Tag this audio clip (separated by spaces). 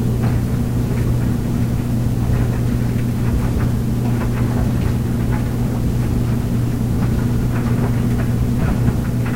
washer household laundry loop quiet dryer